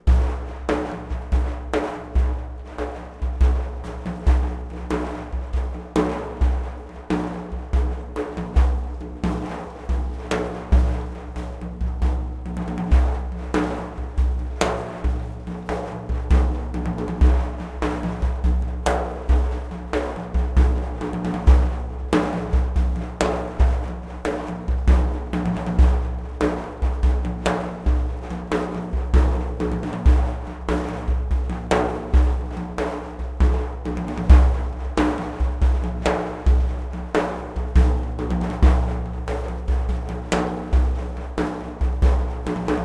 5/8 slow daf rythm with rode NT4 mic, presonus preamp
frame, orient, daf, odd, drums